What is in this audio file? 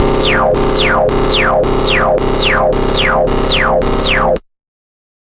110 BPM, C Notes, Middle C, with a 1/4 wobble, half as Sine, half as Sawtooth descending, with random sounds and filters. Compressed a bit to give ti the full sound. Useful for games or music.
wah, synth, electronic, bass, porn-core, LFO, techno, 1-shot, wobble, dubstep, processed, synthetic, synthesizer, notes, digital, Industrial